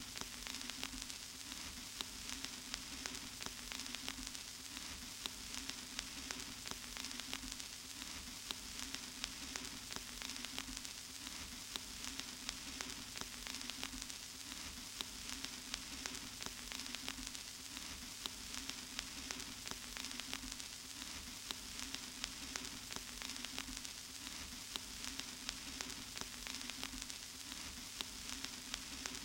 Vinyl Crackle 1
Vinyl Record Crackle